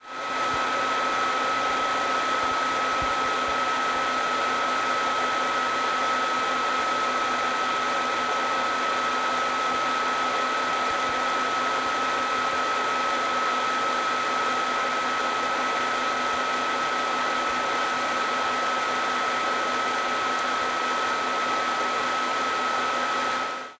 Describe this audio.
A stereo recording of my two-year-old laptop's fan.